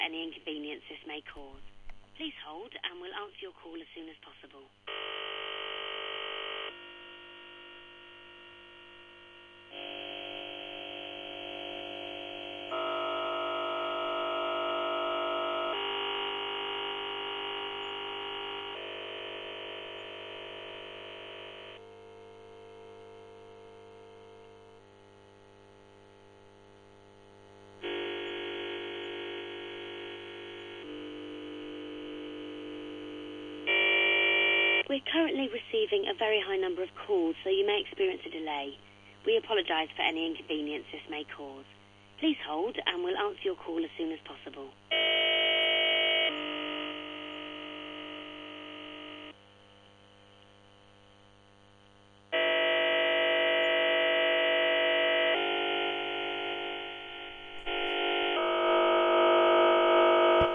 the sound i listened too for a fair while on hold to orange, clearly there machine had messed up and made this noises, much better than Katie perry